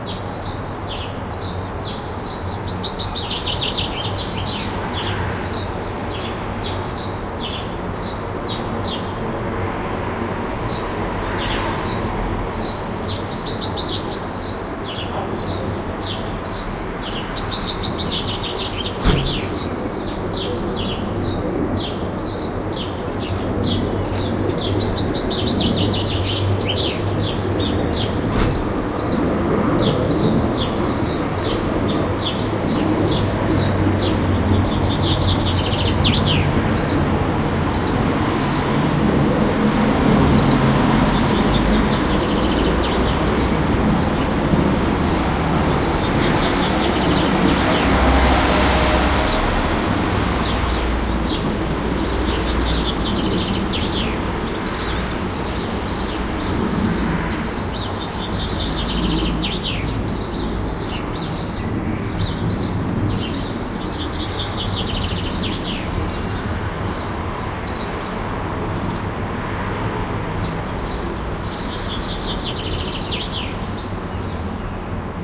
Recording of birds in a city park near a train station.